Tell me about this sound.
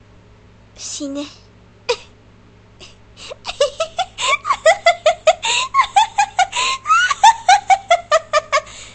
Me japanese fashion (Die) Laugh Yandere
die, evil, female, girl, insane, japan, japanese, laugh, voice, woman, yandere